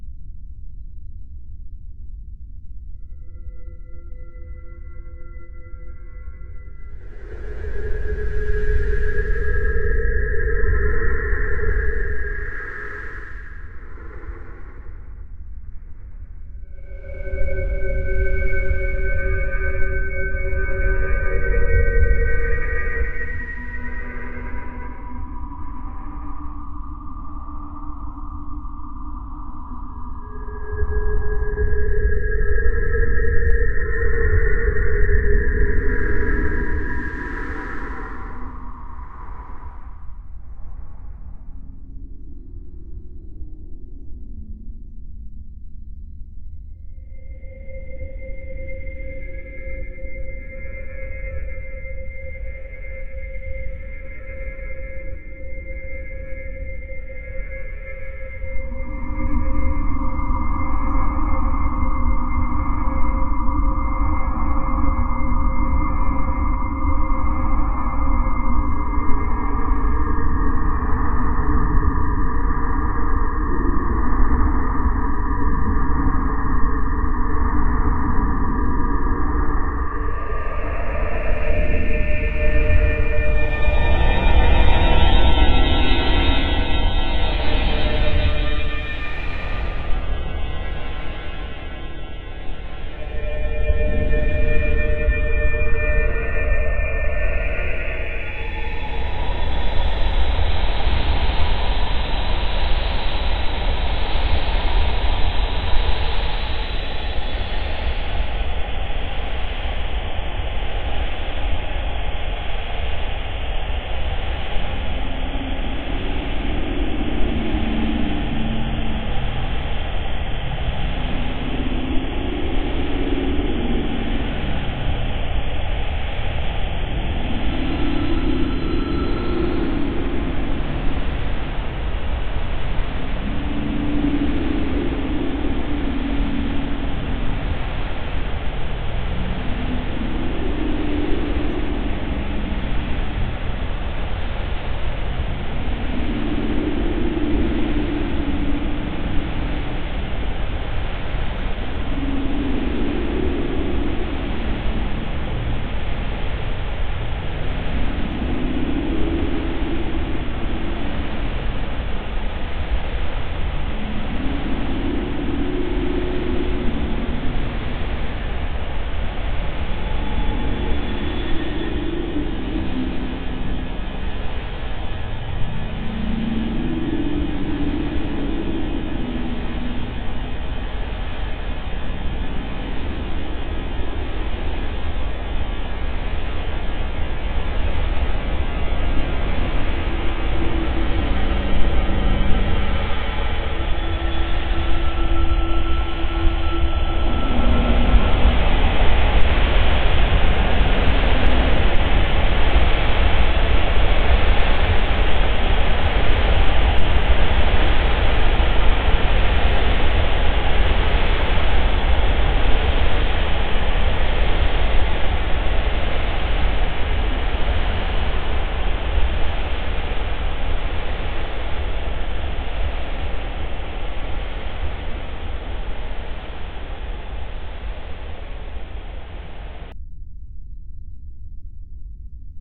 spooky 56k modem aohell
56k modem with various effects... stretched and quad etc..